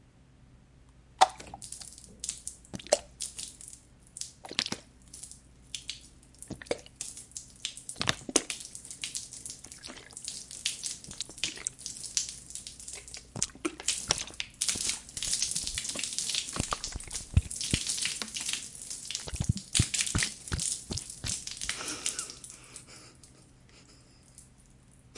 Blood Gush Waterfall
Blood gushing fall to concrete floor; created by pouring a water/corn syrup mixture onto my foley artist's arm over a concrete drain.
Towards the end you can hear my foley artist start laughing because the mixture was splashing everywhere lol... Still a great clip and we used it in a horror slasher film to simulate blood gush from a stabbing.